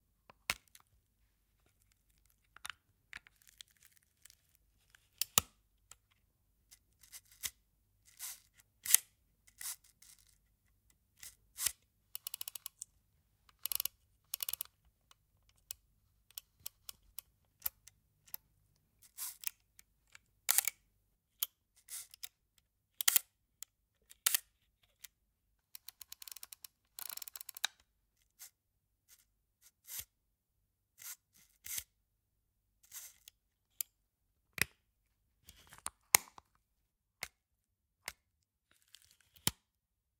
Old Photo Camera Mechanism Sppoling Opening Pressing